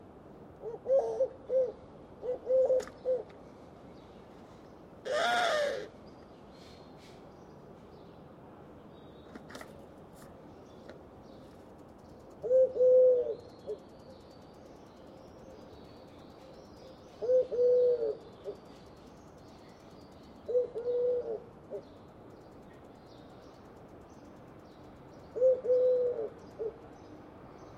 20070120.collared.dove.01
mono recording of a couple doves resting on the plants of my balcony. Senn ME62 > Sony MD > Edirol R09
bird-call, city, collared-dove, cooing, flickr